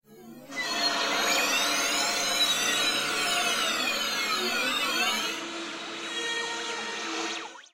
Rusty Spring Phase
Heavily processed VST synth sounds using various phasers, reverbs and filters.
Spring, Metallic, Space, Metal, Rust